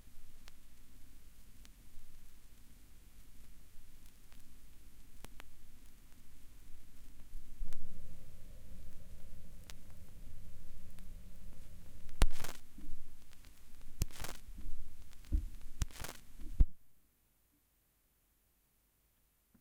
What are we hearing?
Side B End
End of side B of a brand new album. Signal recorded through line.
33rpm, album, crackle, LP, needle, noise, pop, record, surface-noise, turntable, vinyl